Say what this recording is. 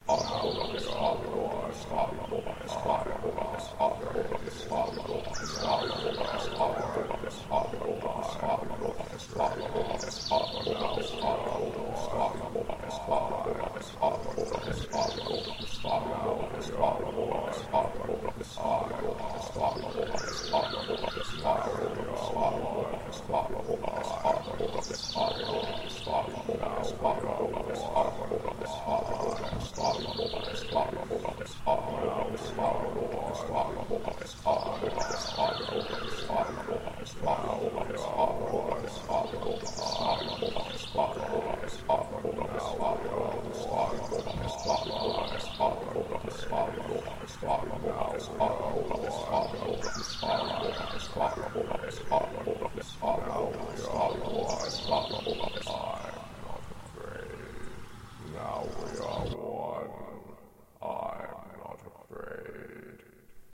Vocal Experiment & Bird Sounds #1
Two rather strange tracks featuring looped and modified vocals (mine) and bird sounds. All sounds recorded and processed by myself.
sounds, creepy, strange, experimental, drone, abstract, bird, ambient, effect, vocal, weird